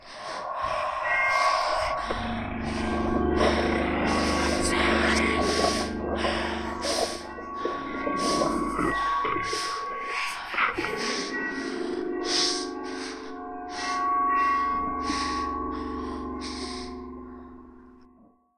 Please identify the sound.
Testing layering. I hope I did a decent job. All parts were made with my voice, a bell, a plush (great puff filter), and a bottle of Coca-Cola life. All parts recorded with a CA desktop microphone. Some parts reversed. Some parts chopped up, some parts have wet or dry reverb. Some parts slowed down. Some parts were pitched up. (the breathing, but idk why, to make it sound like a woman? probably. but it sounds more like a male/kid/teen.) I raised the Hz a bit on the mic, so it sounds (slightly) better/worse.
EDIT: I saw a comment that made me laugh... it said it sounded more erotic than scary! Hahaha! Yeah that's true to be honest... The breathing sounds like a woman doing... uh... you know what. Yeah, sometimes my sounds do sound erotic... Hmmm...